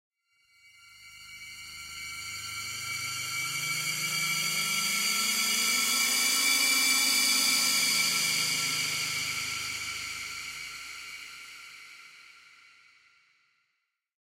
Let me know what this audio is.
Creepy Transition sound fx.